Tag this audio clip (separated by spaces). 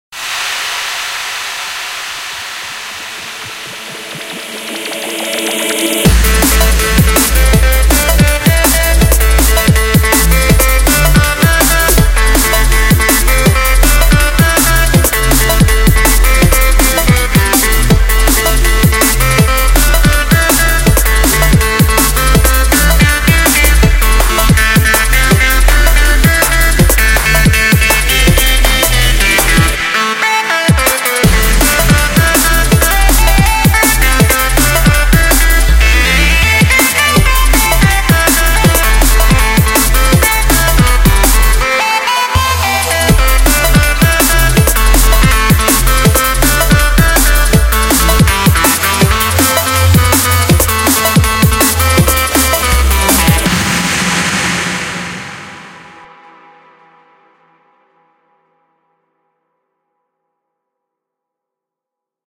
dnb drum-and-bass electronic eq hats kick mastering mix pads snare synths